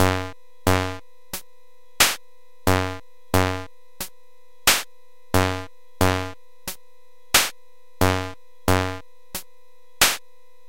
This came from the cheapest looking keyboard I've ever seen, yet it had really good features for sampling, plus a mike in that makes for some really, really, really cool distortion.